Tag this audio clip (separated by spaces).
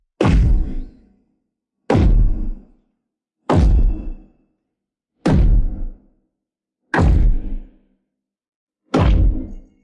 skill spell hit magical witch game-sound magic magician rpg wizard impact